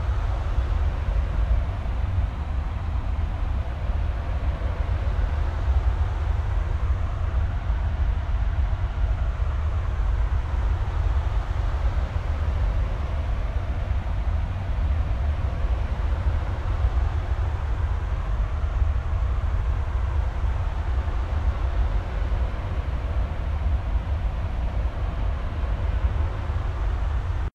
wind created using a panning air vent
vent, air, wind